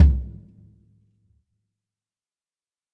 Toms and kicks recorded in stereo from a variety of kits.
drums, stereo